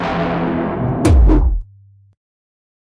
stab hit fx